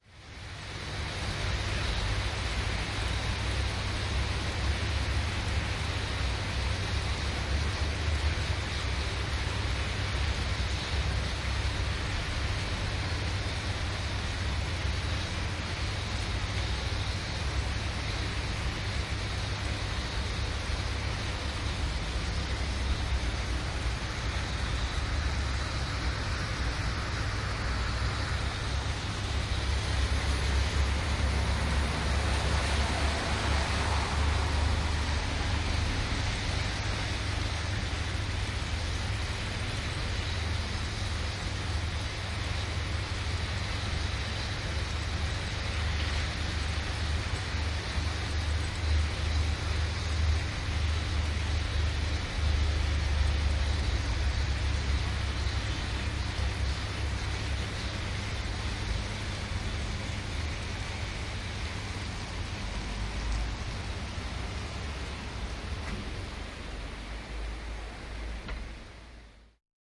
binaural lmnln rain 9th flr
Binaural recording of rain from the 9th floor of an office-building in the city of Utrecht.
atmosphere, binaural, city, rain